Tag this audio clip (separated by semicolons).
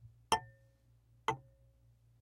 hard; hitting; surface